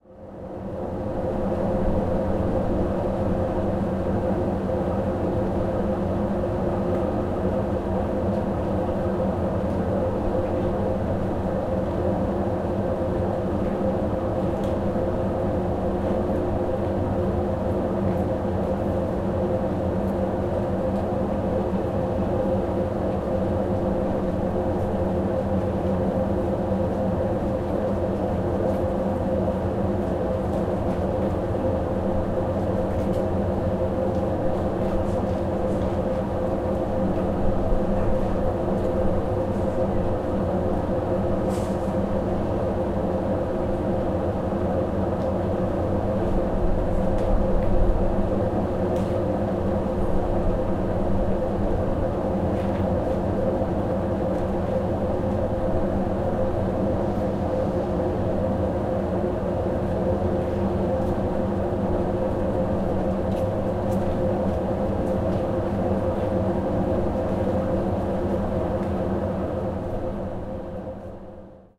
0297 Noisy device Mullae
Noisy device from the floor.
20120616